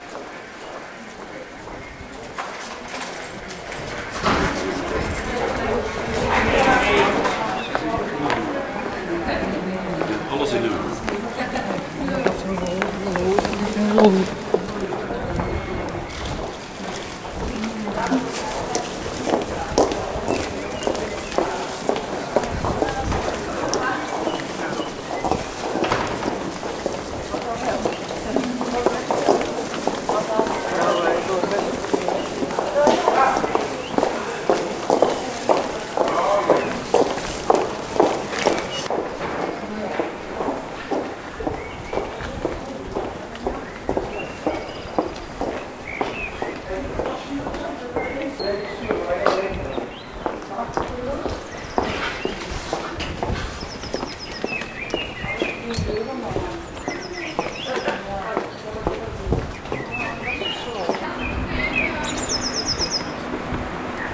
maastricht town sounds
Variation 1) Walking through the city of Maastricht. This was outside of the center, so it's not that crowded, you can even hear some birds. Still there's a bit of traffic driving around.
Recorded with Edirol R-1 & Sennheiser ME66.
people, place, center, walking, field-recording, chatting, driving, town, kids, cars, maastricht, birds, shopping, chattering, city, traffic, centre